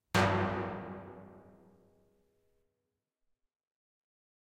Davul(Greek ethnic instrument) Beat Recorded in Delta Studios. Double Beat.
Effect used: Large and dark Hall Space Designer